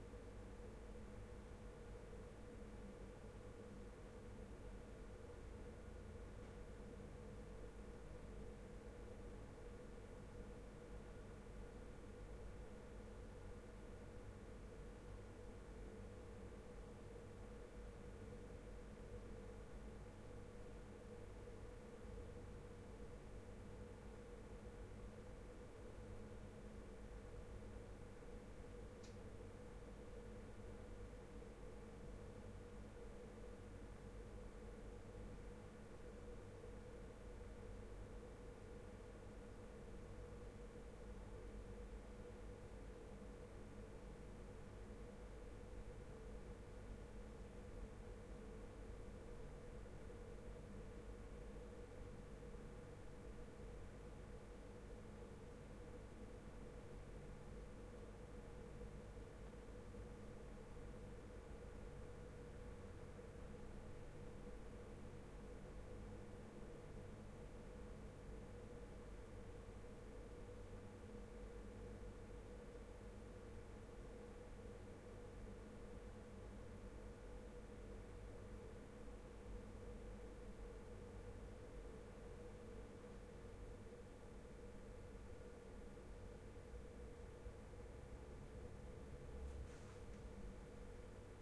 Roomtone Office ventilation
Office, ventilation